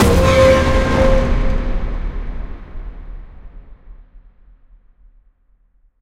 Epic Warning Hit 01
Attack, Cinematic, Creepy, Effect, Epic, Hit, Horror, Mystery, Scary, Sci-Fi, Spooky, Warning